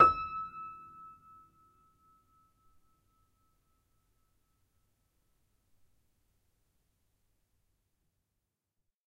upright choiseul piano multisample recorded using zoom H4n